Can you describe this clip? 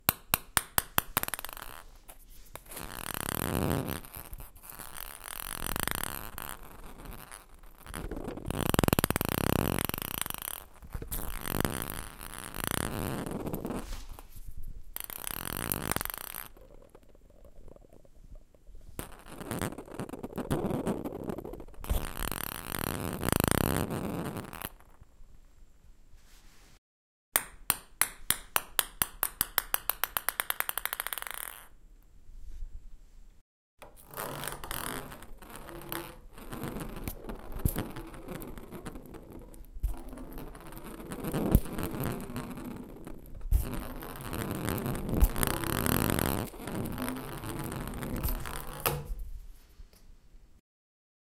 Table tennis ball rolling on a stone bathroom floor and inside a bathtub.